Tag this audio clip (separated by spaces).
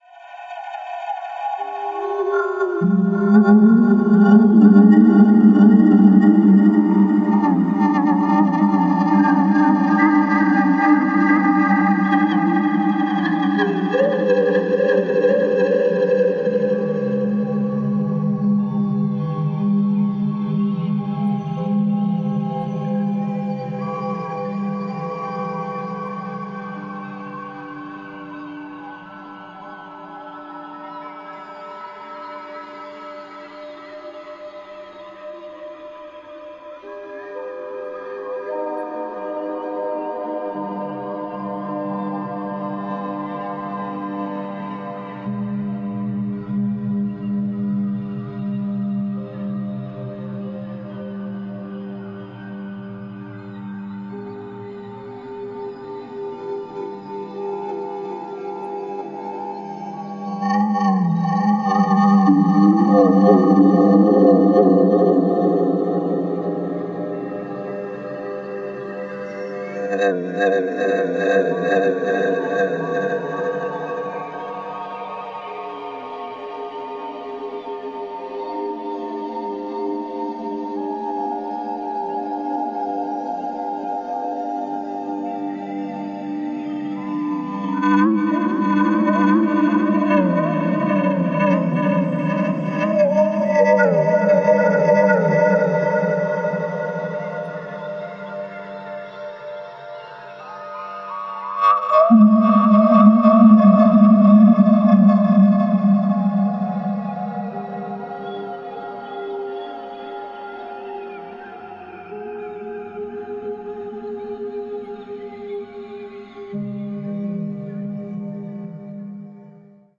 soundscape reaktor ambient